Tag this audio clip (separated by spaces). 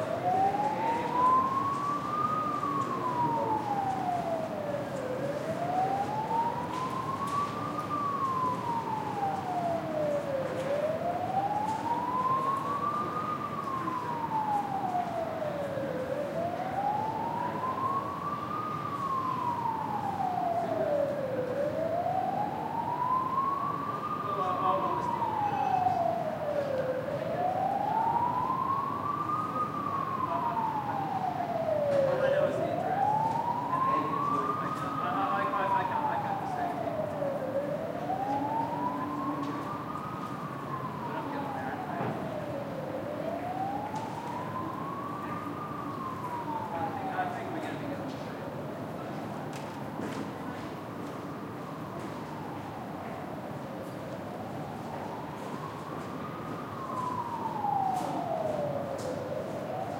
siren silence city night field-recording